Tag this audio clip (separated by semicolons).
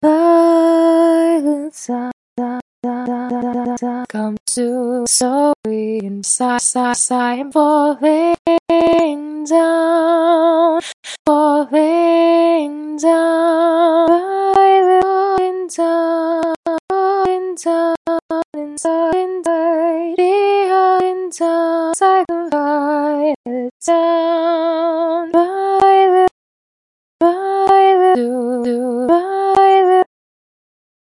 Singing; Chops; Loop; Dry; Vox; Voice; Sing; Vocal; Clean; Female; Girl